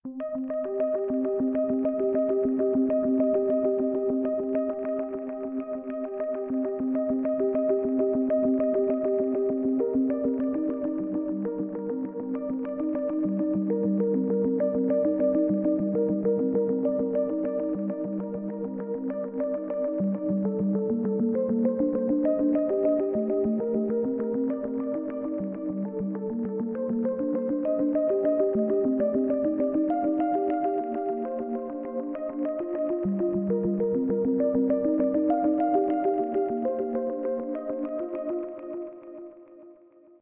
toxic arpeggiate 01
really simple pattern or arpeggio..
synth is somewhat synthetic sounding but
kinda smooth. not complicated...
synth-arp, arpeggiator, arpeggio, synth